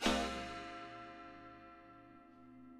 China cymbal scraped.
china-cymbal, scrape, scraped